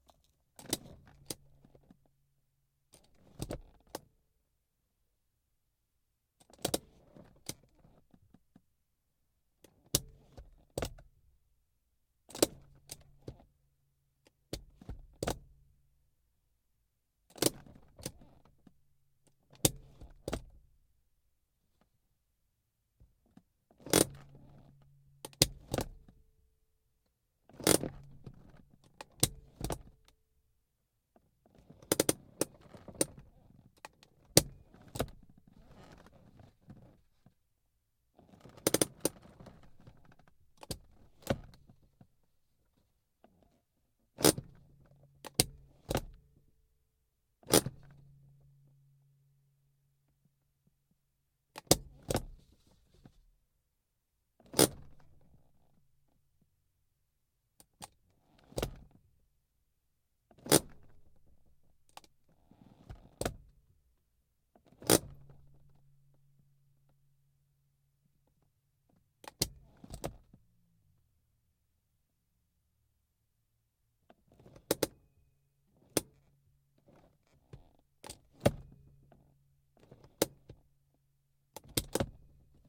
Clip featuring a Mercedes-Benz 190E-16V e-brake (or handbrake) being applied and released. Recorded with a Rode NTG2 about 1" away from the handle. Some handling noise and leather noise from the seat apparent.
e-brake, engine, benz, dyno, vehicle, car, vroom, ebrake, handbrake